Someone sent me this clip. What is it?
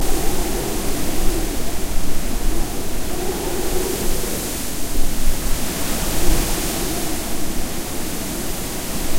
strong wind2
blow, blowing, gale, howling, weather, windstorm, windy